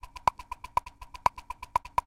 Plastic Woodblock Rhythm
Originally recorded by hitting a plastic pill bottle against a wood poll, I sped up a section of the sample and looped it to resemble a fast-paced shaker pattern.
shaker, plastic, woodblock, rhythm, MTC500-M002-s13